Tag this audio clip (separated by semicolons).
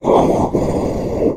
arcade; brute; deep; Demon; Devil; fantasy; game; gamedev; gamedeveloping; games; gaming; indiedev; indiegamedev; low-pitch; male; monster; RPG; sfx; Speak; Talk; troll; videogame; videogames; vocal; voice; Voices